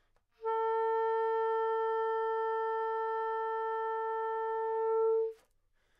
Part of the Good-sounds dataset of monophonic instrumental sounds.
instrument::clarinet
note::A
octave::4
midi note::57
good-sounds-id::3298
A4, clarinet, good-sounds, multisample, neumann-U87, single-note